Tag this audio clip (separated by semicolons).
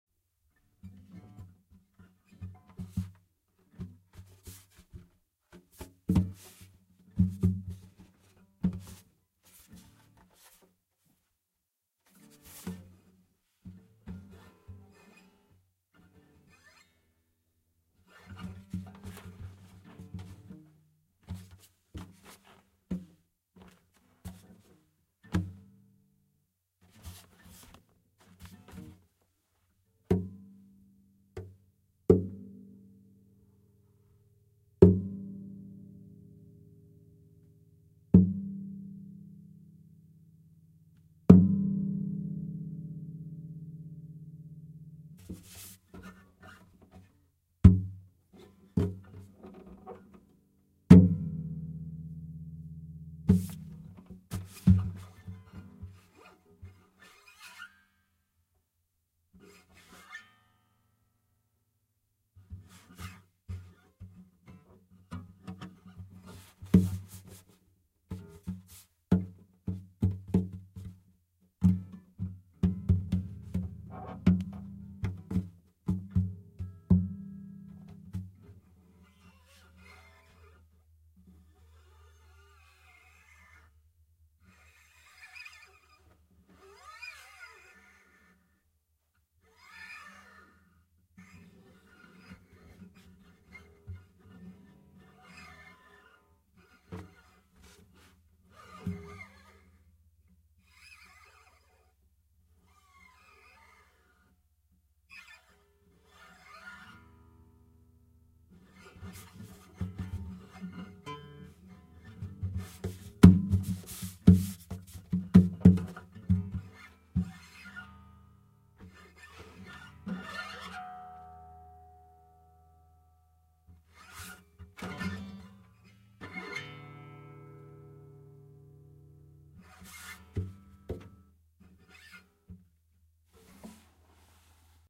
acoustic; cello; foley; grab; grabbing; guitar; handling; hollow; instrument; knock; knocking; manipulation; moving; piccolo; rub; rubbing; strings; violin; wood